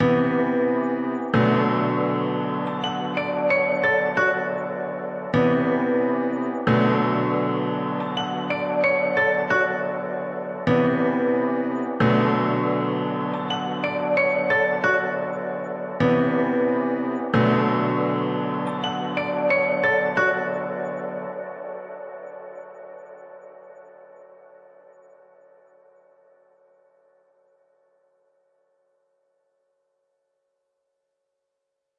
Podcast loop 001 only piano with melody short loop 90 bpm
90, backround, bass, beat, bpm, drum, free, loop, loops, music, percs, piano, podcast